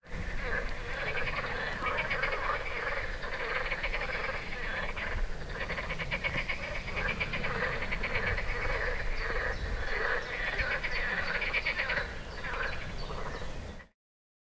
Frogs on river.
Frog's song on Teterev river (Ukraine) in May.
forest, frogs, croaking, birds, nature, spring, field-recording, ambiance